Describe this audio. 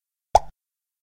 Mouth pop
Single unsynthesised pop sound, made with a finger and mouth. Slightly high pitched.
mouth-pop, finger, mouth, foley, human, pop, cheek